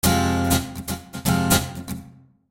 Rhythmguitar Fmin P112
Pure rhythmguitar acid-loop at 120 BPM